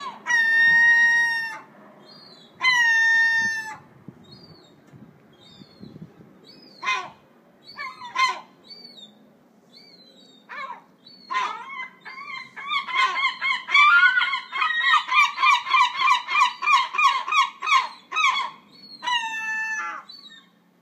Herring Gull 2
This sound was recorded in Scheveningen, The Netherlands. It is answering to the calls of his mate at our roof top where they nest. The gulls visit us for at least since 2005.
calling, gull, gulls, Herring-gull, yelling